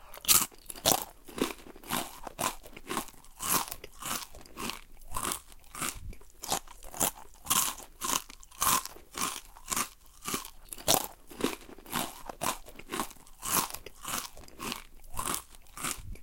eating crisps2
chips, crisps, crunch, crunchy, eat, eating, potato